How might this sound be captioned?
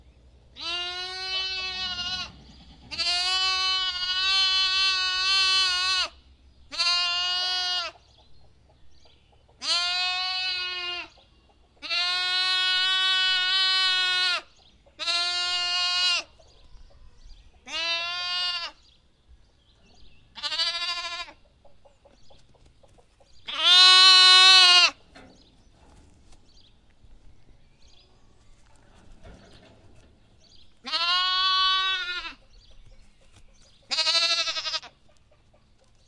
from a zoom h1, a hungry lamb wants some milk. Levin, New Zealand